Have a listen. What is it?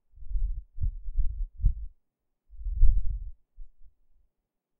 rumble caused by fumble on a zoom
deep, rumble, rumbling